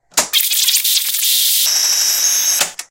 Tape recorder rewind (Fanmade)
All right, my first contribution and I hope I will be able to help you with some more good sound effects on the way. Here's a sound effect of a cassette tape being rewound in a tape recorder, although I kinda tweaked with the effect. Hope you like it, fellas.
reverse, tape, record, recorder, cassette, effect, playback, electric, freaky, reel-to-reel, sound, lo-fi, machine, sfx, rewind, player, noise